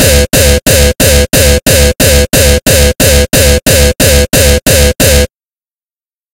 xKicks - Bypass thy Heart
Im sorry I haven’t been uploading lately… I really can’t give a reason as to why i haven’t been uploading any of the teaser kicks lately, nor have i given any download links for the actual xKicks volumes 1 or 2…
Ive actually finished xKicks volumes 1 - 6, each containing at least 250 unique Distorted, Hardstyle, Gabber, Obscure, Noisy, Nasty kicks, and I’m about to finish xKicks 7 real soon here.
Here are various teasers from xKicks 1 - 6
Do you enjoy hearing incredible hard dance kicks? Introducing the latest instalment of the xKicks Series! xKicks Edition 2 brings you 250 new, unique hard dance kicks that will keep you wanting more. Tweak them out with EQs, add effects to them, trim them to your liking, share your tweaked xKicks sounds.
xKicks is back with an all-new package featuring 250 Brand new, Unique Hard Dance kicks. xKicks Edition 3 features kicks suitable for Gabber, Hardstyle, Jumpstyle and any other harsh, raw sound.
Add EQ, Trim them, Add Effects, Change their Pitch.